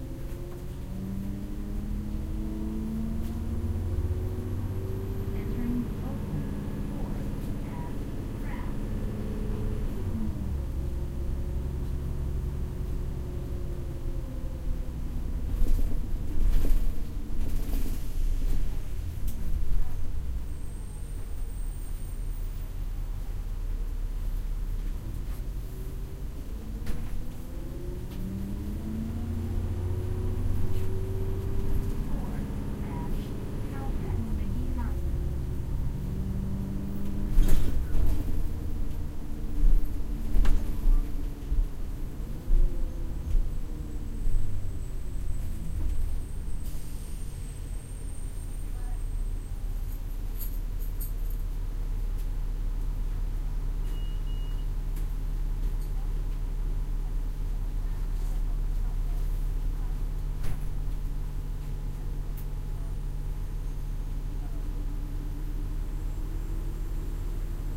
Binaural recording on a Pittsburgh bus through Oakland.